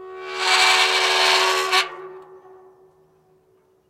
hard grating metal gate
A metal door in my street in Lyon France, which produces a strange grating sound.
gate, door, squeak, metal, grate